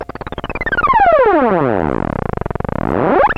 A funny synth sweep from a Nord Modular.